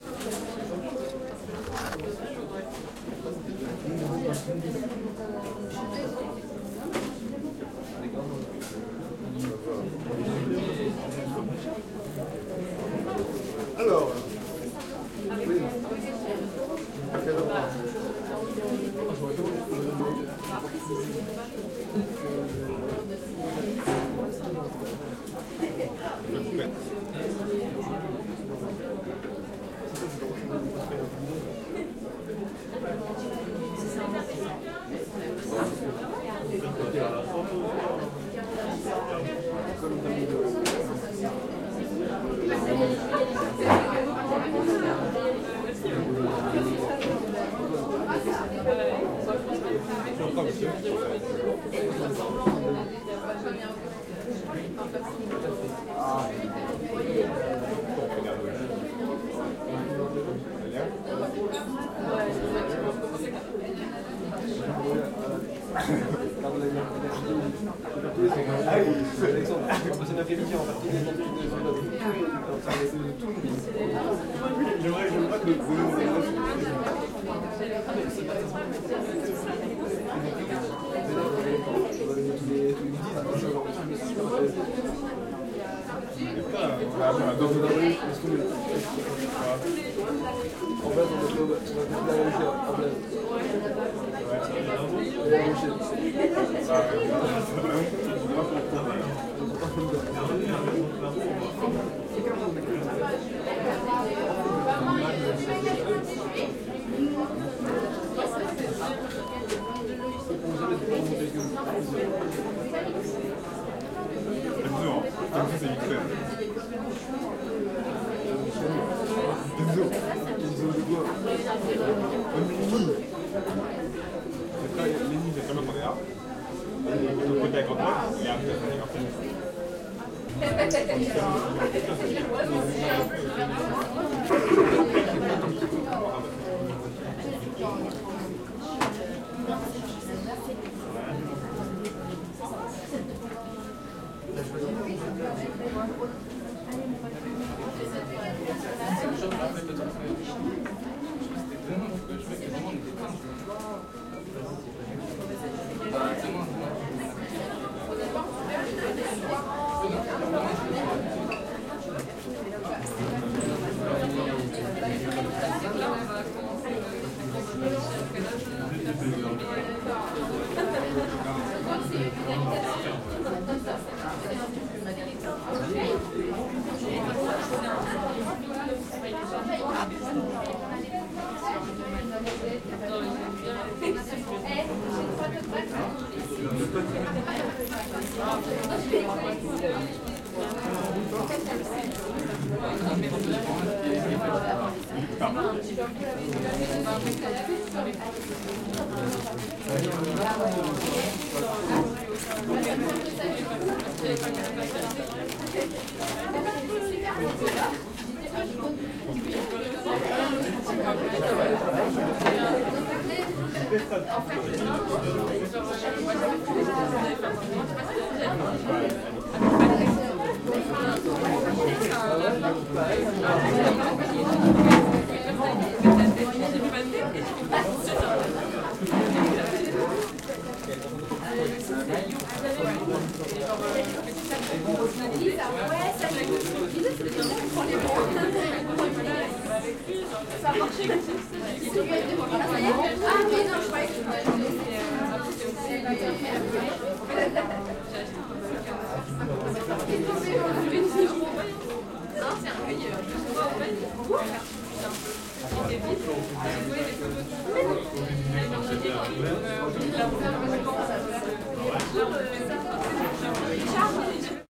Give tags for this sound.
walla ambience people fast-food ambiance restaurant field-recording indoor mac-donald atmosphere

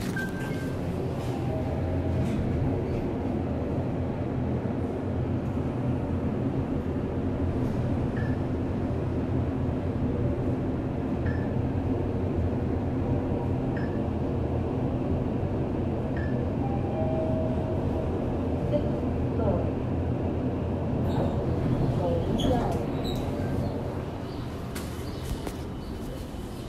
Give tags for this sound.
elevator intercom ride hawaii hotel

